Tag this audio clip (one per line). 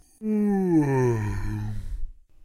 Tired
Sleepy
Yawn